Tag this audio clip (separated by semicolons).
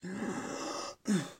breath; casancio; male; man; Respirar